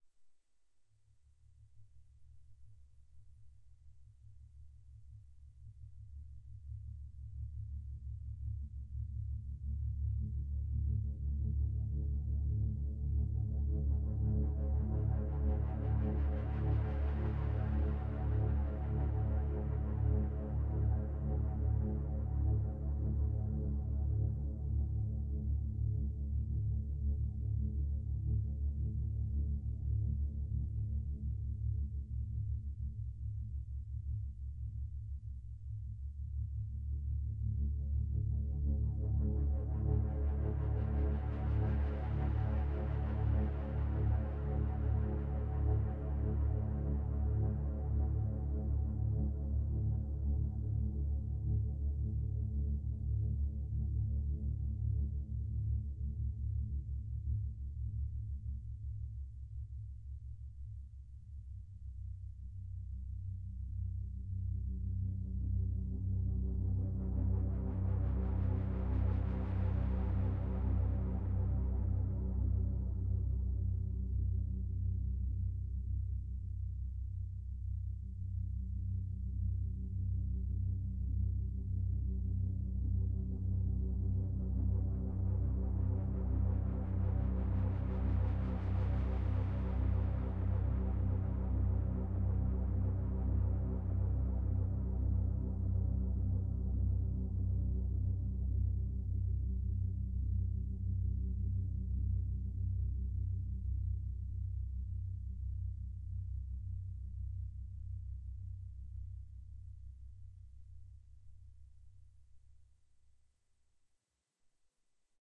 sci-fi drone ambience
Drone ambience music created for various purposes created by using a synthesizer and recorded with Magix studio.